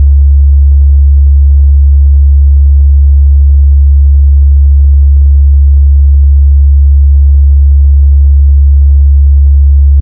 Low Frequency Humming Noise
Here is a sound that I produced in Audacity by generating a tone and making the frequency very low. It sounds rather like a humming of a machine or electrical appliance! Good for looping and such
Enjoy !
electric, electrical-noise, frequency, hum, humming, low, low-frequency, low-hum, machine, machine-noise, noise